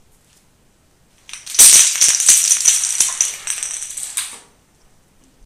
PVC Pipe Spill
I dumped a bunch of small cuts of PVC piping onto a tile floor.